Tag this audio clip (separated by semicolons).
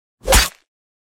Action; Weapon; FX; Swing